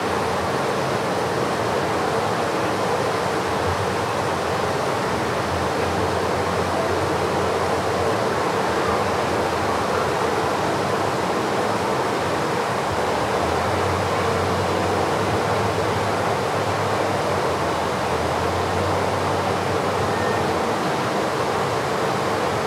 Water Flowing In Tubes
Water flowing through some tubes.
flowing, flow, stream, liquid, tubes, water